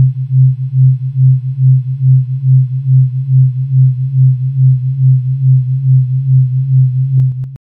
Detuned sine waves